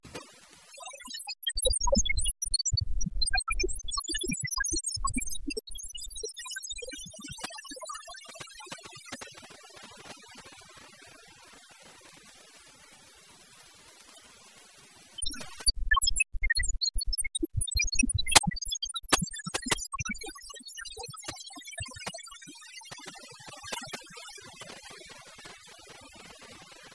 Rocket Launcher Interference
Interference caused by a BGM-109 Tomahawk land attack missile launch.